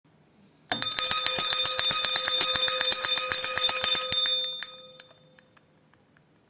sound of the bell